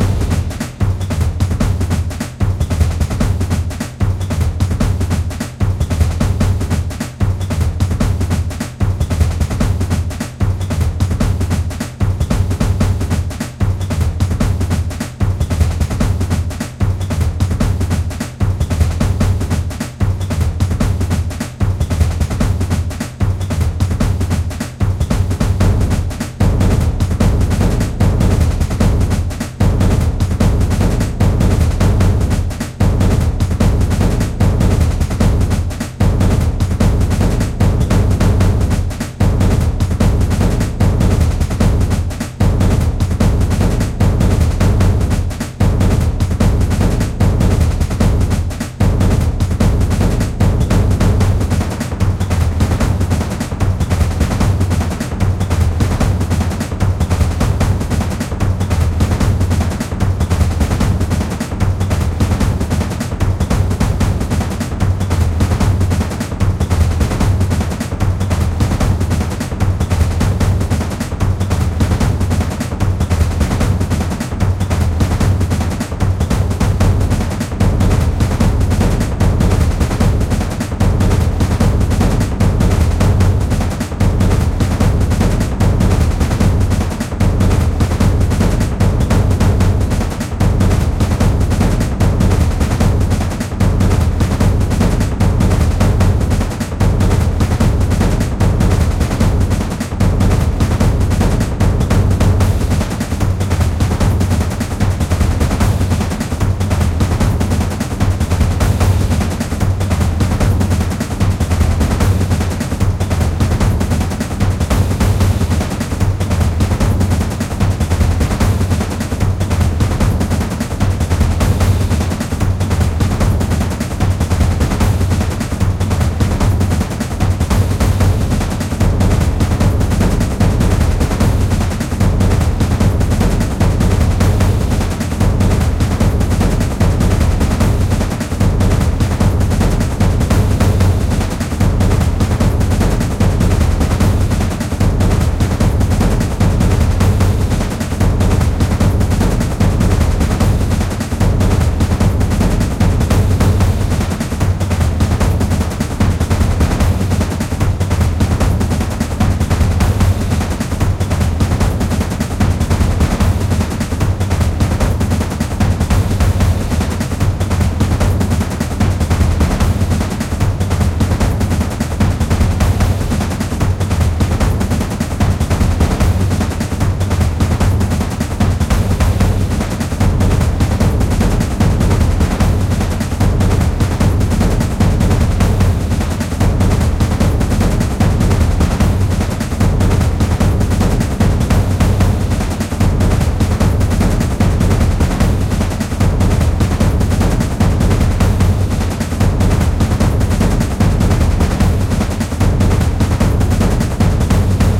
In an totalitarian state you're on the run. People snitch on your position both through security cameras and eye sight. You cannot hide anywhere. You cannot trust anyone. Everyone is your enemy although they have no guns.
This drumloop consists of 4 parts, each part adds a new drumloop to the overall sound. Last half of the part has a military bassdrum added for extra flavour.